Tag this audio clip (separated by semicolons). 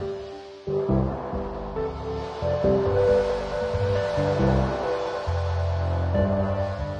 Ambiance; Ambience; Ambient; atmosphere; Cinematic; commercial; Looping; Piano; Sound-Design